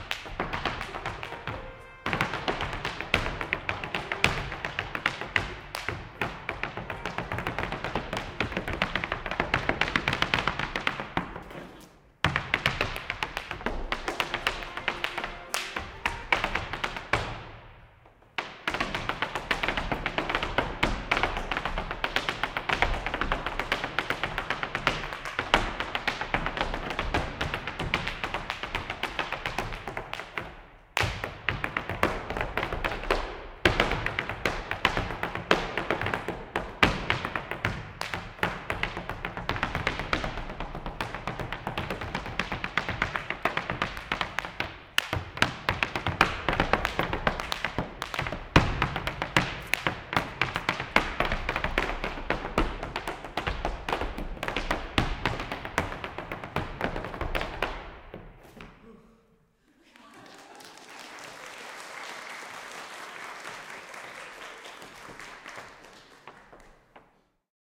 irish dancing
A teenager who is internationally ranked for this style of dancing gives a demonstration in a echoey school gym. There is some applause at the end from the people watching.
Recorded with an AT4021 mic into a modified Marantz PMD661.